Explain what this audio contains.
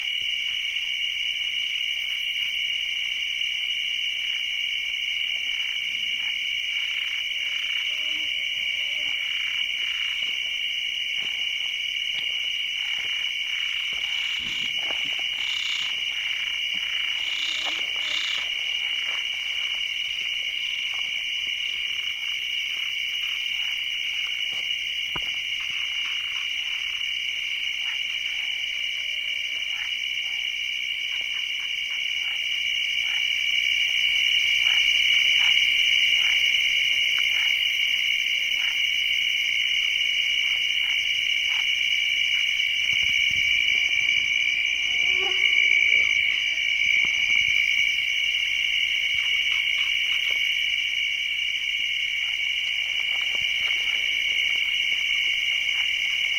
20060616.crickets.guadiamar03
a booming chorus of crickets recorded at night near Guadiamar (Doñana, South Spain), with some frogs in background. Sennheiser ME62 > Shure FP24 > iRiver H120 (rockbox)/ un coro atronador de grillos grabado por la noche cerca del Guadiamar (Doñana), con algunas ranas al fondo
crickets, ear-to-the-earth, field-recording, insect, nature, night, summer